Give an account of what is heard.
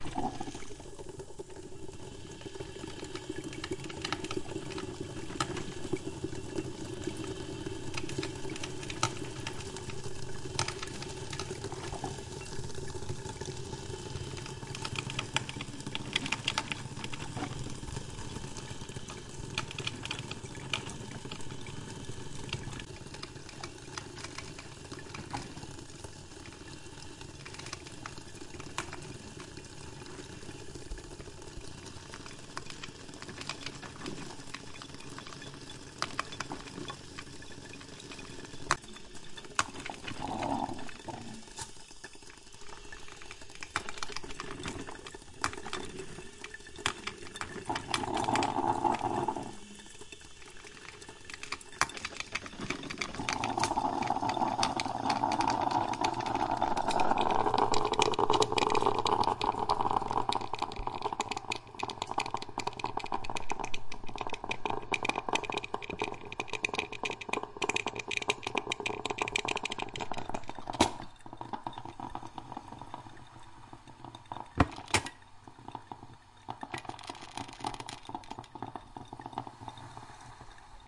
Percolator recorded with a Tascam dr-07 mkII at my mother in law's for a musical piece : bubbling, gargling, steam and subliminal vocals.